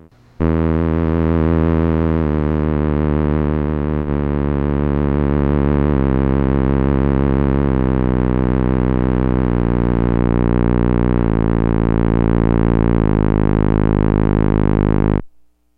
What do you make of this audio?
scifi chromatabot a
Low-pitched. Mono. Dry. Descending chromatic scale played on the theremin. Saw-tooth, buzzy, giant robot steps. Recorded dry so you can add the effects you wish.
chromatic-descent, theremin